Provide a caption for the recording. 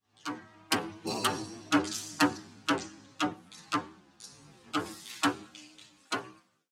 The sound of tapping on a computer manipulated to sound more metallic. The sound was stretched out and was recorded with a MacBook Pro microphone.